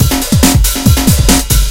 Re909brk-1
An Indus-jungle drumloops done with fl7 and rebirth rb338. Tr-909's sounds inside